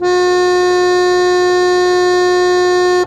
single notes from the cheap plastic wind organ